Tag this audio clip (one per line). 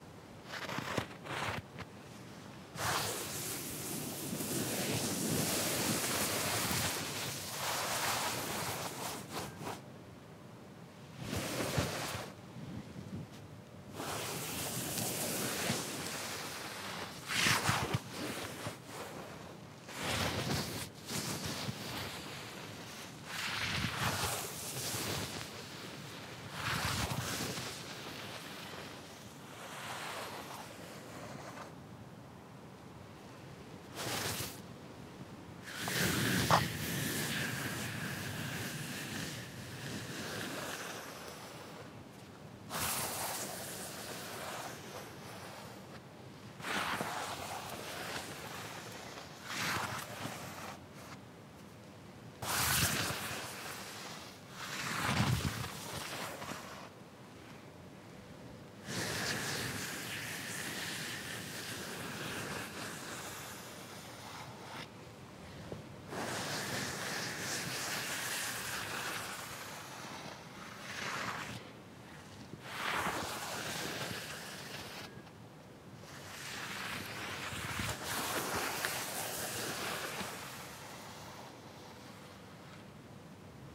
Rough
Sand
Sandpaper
Texture